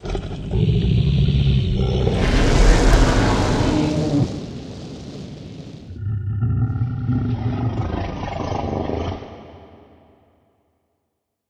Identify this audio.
Dragon Roar
Something i threw together for a personal project i was working on. I couldn't find any high quality samples of a Dragon so i decided to make my own.
This was created using Ableton and contains the following sounds:
A Dog Growling
A lion Roar
A Horse Grunting
An Elephant Vocalising
A Flamethrower Sound
The combining, layering and processing of the sounds were all done by myself within my DAW.
I hope those of you who find this are able to make great use of it.
Sound-Design, Fantasy, Dragon, Roar, Fire, Growl, Monster